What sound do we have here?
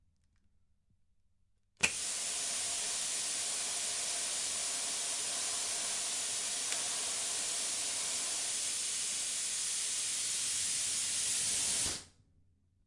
Plasma cutter gas
Turning on the gas for a plasma cutter.
plasma, Roland, r26, R, melting, machinery, cutter, de, industrial, ntg3, power, tools, metal